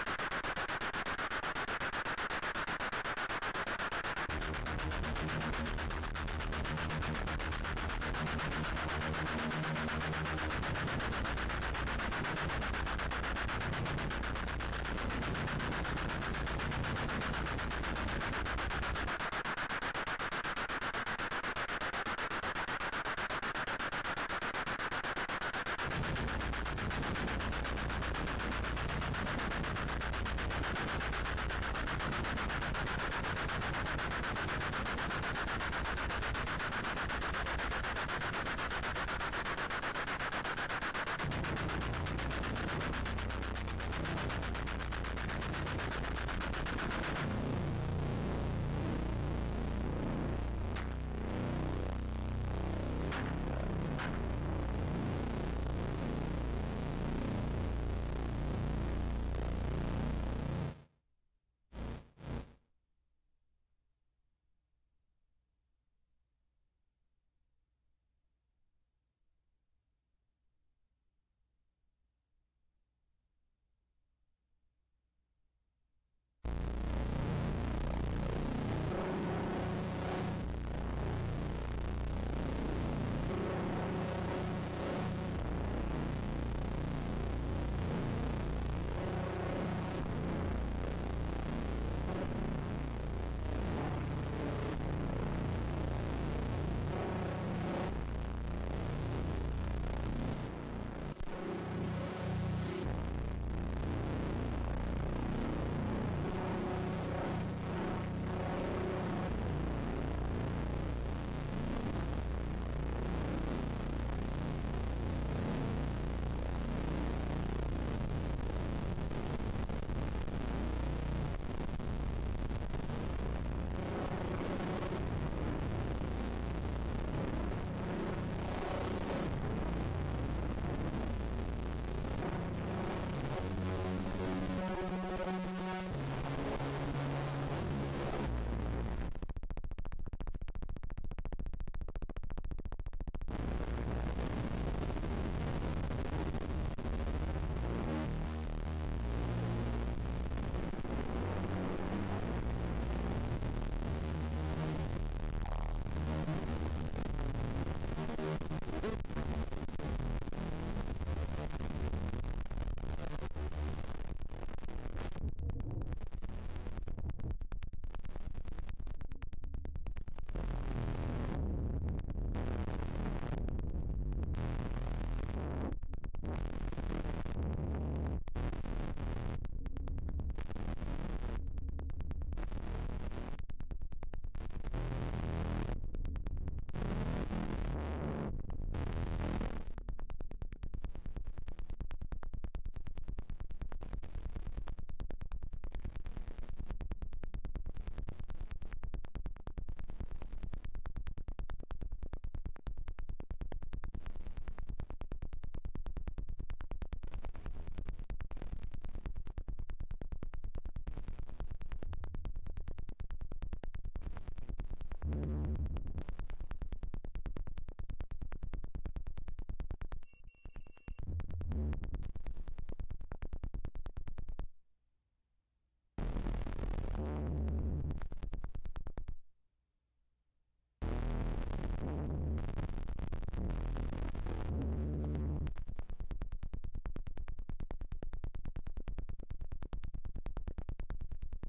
kms2k-2014-smr-00-clapwarp
Korg MS-2000 > Art Preamp > H4n Zoom direct xlr connection
Mono